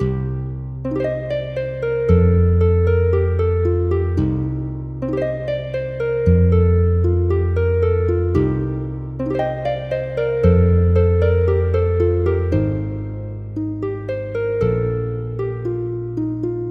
This is a tune I used to play on my guitar.
Beat, Melody, Nostalgia, Soothing, Strings